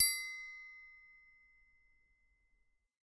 Triangle hard open sound
idiophone, percussion